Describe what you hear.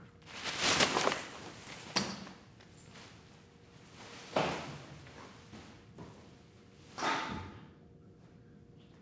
garbage bag (3)
Plastic garbage bag full of junk picked up and tossed away from the microphone.
Recorded with AKG condenser microphone M-Audio Delta AP